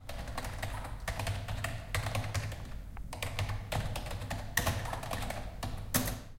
The sound of the keys of a keyboard. Recorded with a tape recorder in the library / CRAI Pompeu Fabra University.
campus-upf, library, UPF-CS14, keyboard